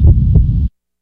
A heartbeat sound from my Triton. Check out my Industrial muSICK "Gears Of Destruction"
heartbeat, horror, organic